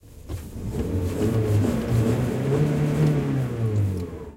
09 Car engine
car, CZ, Czech, engine, Panska